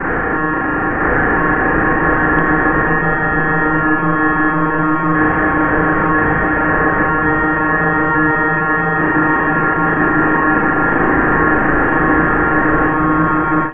A nasty oscillating sound procured from international radio air wave transmissions and modified and altered using one of my favorite audio editing programs.
It's a pretty simple noisy sound effect that is suitable for sampling and looping should you need to extend the sound or create layers with it.
It's quite science fiction sounding. But it's also quite suitable for any purposes really.
If you use my sound for any composition or application of any kind, please give me a credit for the sound sample. Enjoy.
Comments are always welcome!
air-wave, alien, broadcasting, cacophony, cosmos, frequency-sweep, military, modulation, noise, oscillation, radar, radio, science-fiction, signal, space, transmission, white-noise